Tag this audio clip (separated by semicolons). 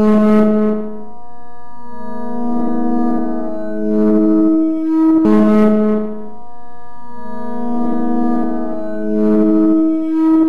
effects; piano